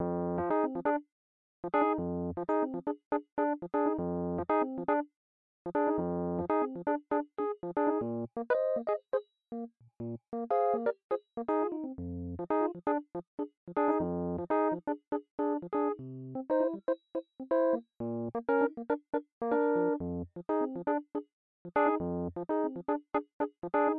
Song1 RHODES Fa 4:4 120bpms
120, beat, blues, bpm, Chord, Fa, HearHear, loop, Rhodes, rythm